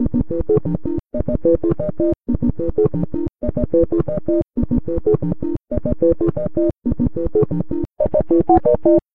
george in da tekjunglematrix
a button sequence of a phone transformed into a tekno sound
weird, phone